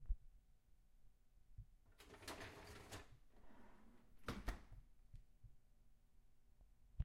Closing a Whirlpool dishwasher